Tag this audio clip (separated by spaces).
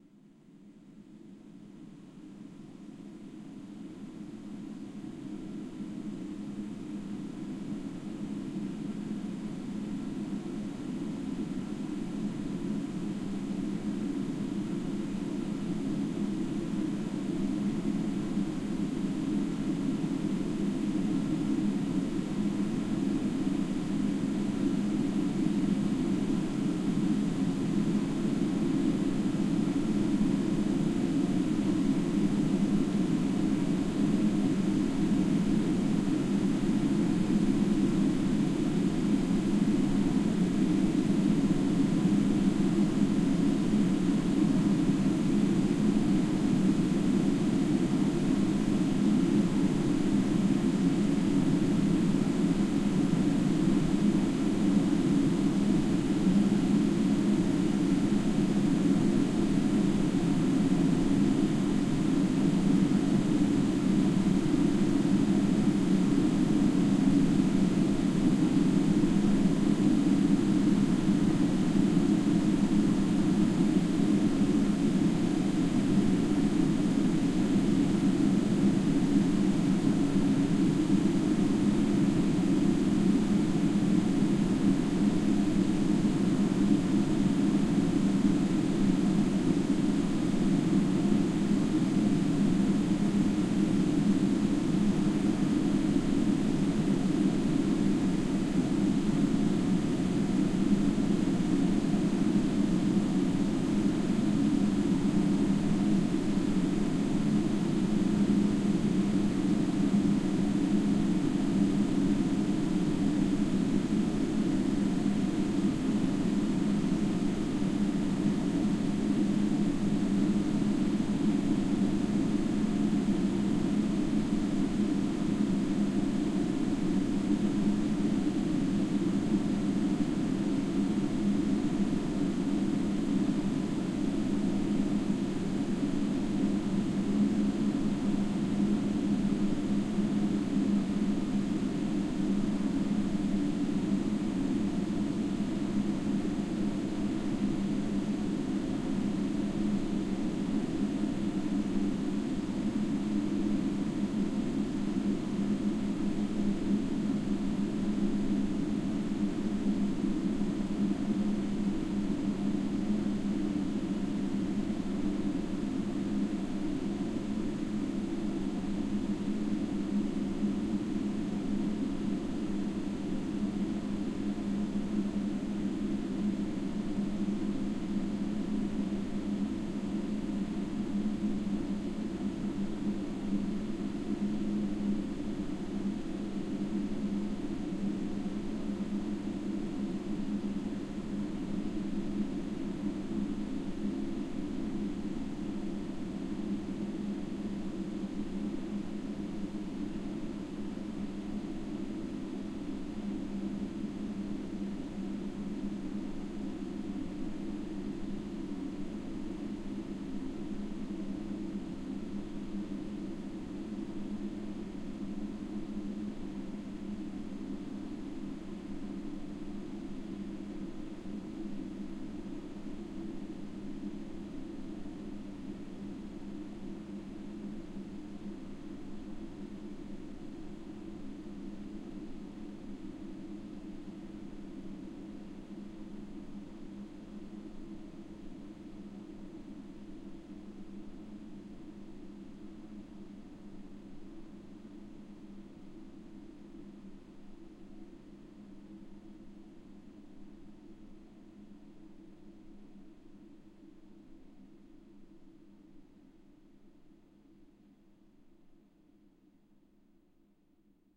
ambient nature wind gust air ambience 60009 blow